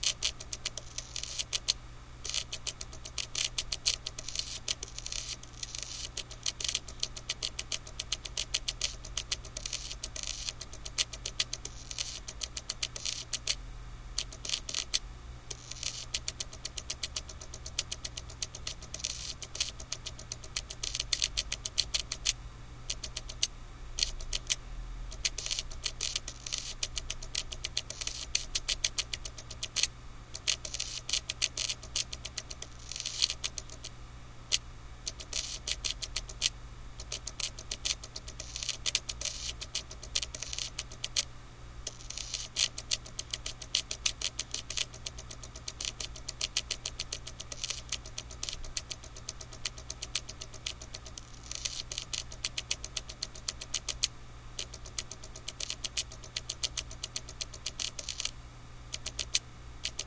MTrk Internal Hard Drive Defrag Clicks Clanks 1 FSP4824
There are three of these files. I used the Microtrack to record them. I put the mic on an extension and stuffed it inside of an already noisy drive and ran a defrag. These files are samples of the different types of noise that I got. Basically it's a close perspective on a working/struggling hard drive.
clanks, clicks, computer, defrag, drive, hard, internal